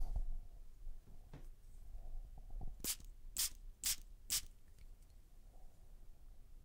Spraying Cologne
cologne, deodorant, spray, spraying